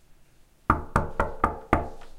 knocking on door